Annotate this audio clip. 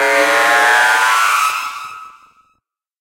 Seamless loop of a synthetic alarm sound with enough reverberation to sound as if it could be on a ship of some sort, or in a factory, or whatever. This is not modeled on any real sound, but created from scratch mathematically in Cool Edit Pro.
abox, alarm, alert, ambient, klaxon, loop, sci-fi, ship, synthetic